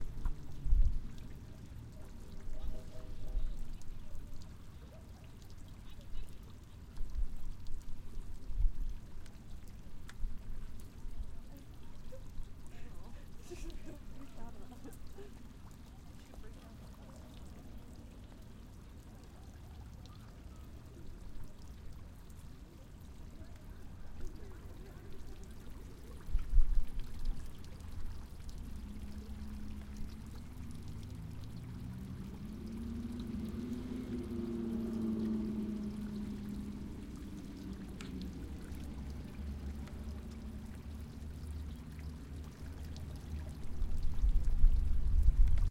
frog baby 2 1
Frog Baby fountain water flowing (JZ)
water, fountain